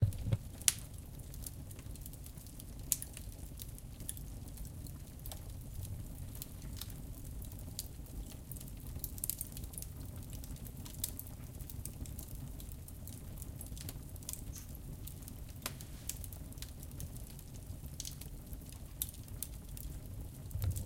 Fire in my home, in a fireplace in Ecoche (Loire) in France.
Feu de cheminée, chez moi, à Ecoche (Loire) en France
fire, feu, e, burn, fireplace, flame, chemin